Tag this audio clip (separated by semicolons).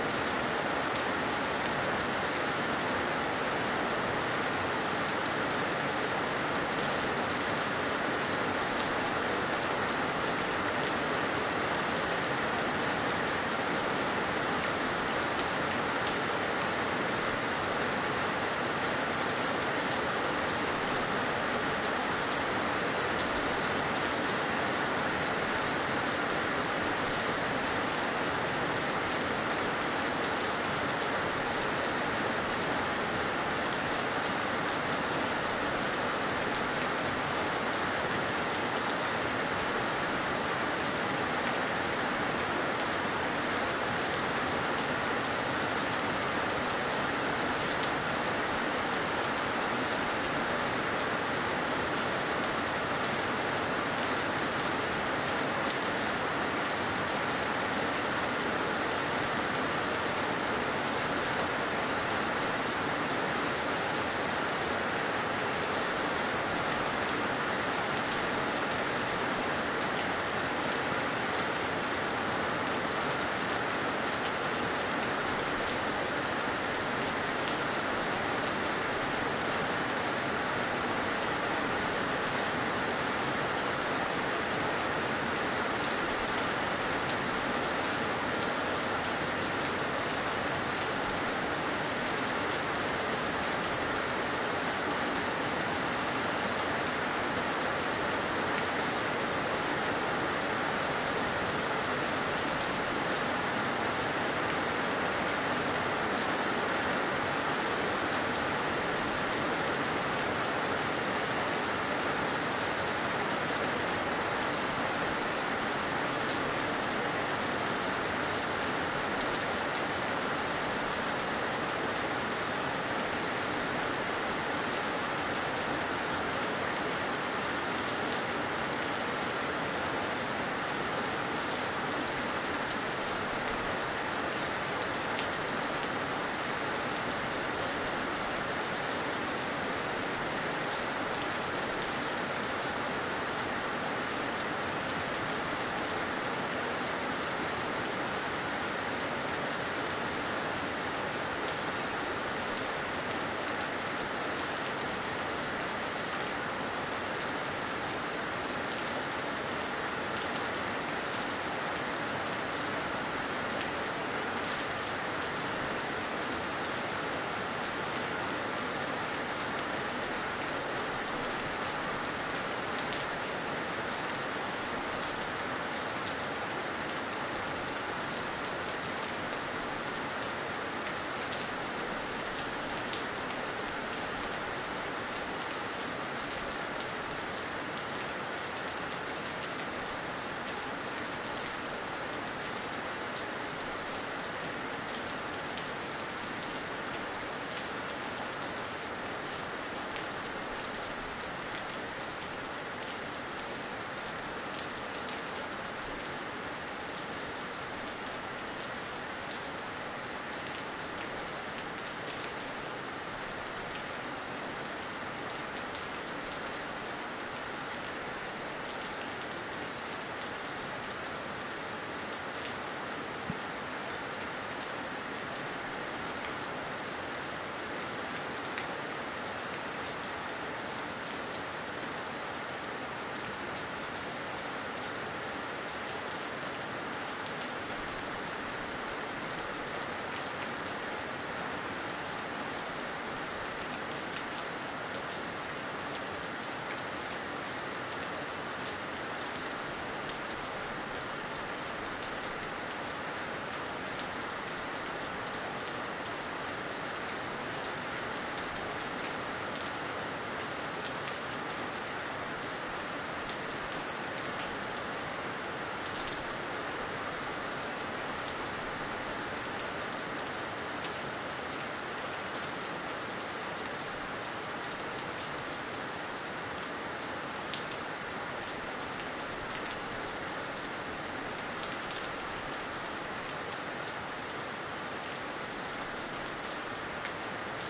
ambiance; ambience; ambient; concrete; drip; dripping; drops; field-recording; Island; pour; rain; raindrops; raining; shower; Tropical; water; weather